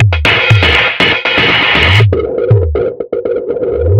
20140306 attackloop 120BPM 4 4 Acoustic Kit Distorted loop1f
This is a loop created with the Waldorf Attack VST Drum Synth. The kit used was Acoustic Kit and the loop was created using Cubase 7.5. The following plugins were used to process the signal: AnarchRhythms, StepFilter (2 times used), Guitar Rig 5, Amp Simulater and iZotome Ozone 5. Different variations have different filter settings in the Step Filter. 16 variations are labelled form a till p. Everything is at 120 bpm and measure 4/4. Enjoy!
granular, rhythmic, 120BPM, distorted, beat, electro, loop, dance, electronic, drumloop, filtered